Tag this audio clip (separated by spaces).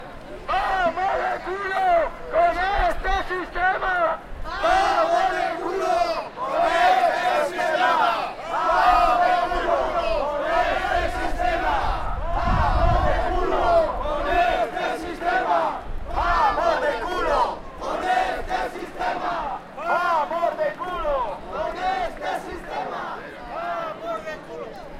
manifestaci demonstration protest PAH